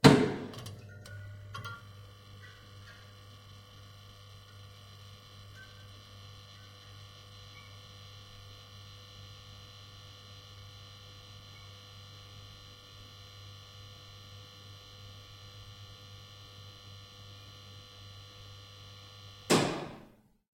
Old Fluorescent Fixture
Old fluorescent light fixtures with switching sounds in the beginning and end. The microphone was placed close to one fluorescent tube.
tube, switching, buzz, lamp, tubes, swith, electricity, fluorescent, electrical, fixture, lights, switch-on, hum, electric, field-recording, humming, light